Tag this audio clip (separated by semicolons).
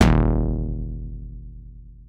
softsynth; abl; tb-303; drums; realism; percussion; bassdrum; pro